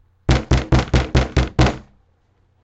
pounding-on-door
My brother and I made this by pounding on a wood table.
Knock, Bang